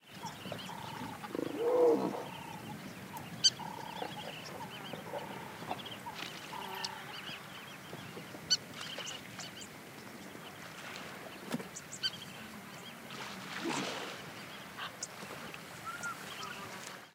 Hippo-Gargouillis+amb oiseaux
Some animals again (hippopotamus, birds...) in Tanzania recorded on DAT (Tascam DAP-1) with a Sennheiser ME66 by G de Courtivron.
gnu, africa, wildebeest, bird